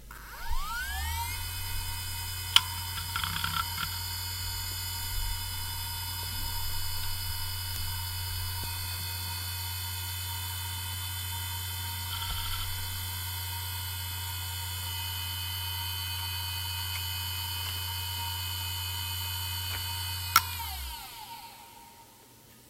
Maxtor DiamondMax D540X - 5400rpm - BB
A Maxtor hard drive manufactured in 2001 close up; spin up and spin down.
(4d040h2)
machine, disk, drive, hard, rattle, maxtor, motor, hdd